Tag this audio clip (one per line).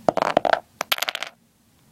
block
crash
drop
hit
impact
wood
wooden